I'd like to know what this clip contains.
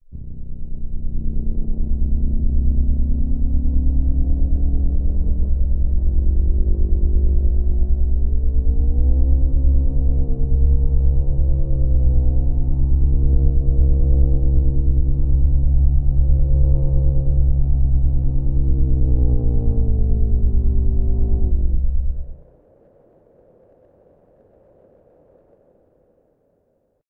Cruising on Mars
rover on mars. Synthesized, 3 synths, layered sounds.
ambience, driving, space, future, fiction, sci, mars, star